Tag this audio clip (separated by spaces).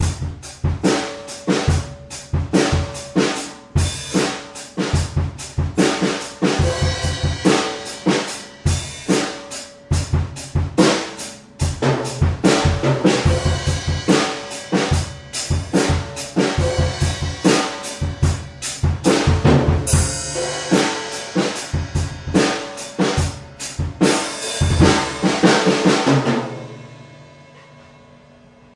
145bpm drums half-speed